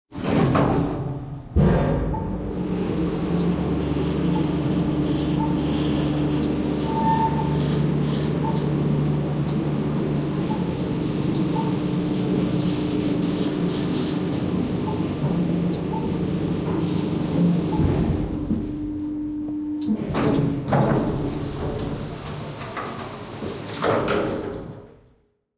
recordings was made from inside the elevator itself.